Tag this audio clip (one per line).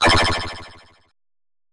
effects
FX
Gameaudio
indiegame
SFX
sound-desing
Sounds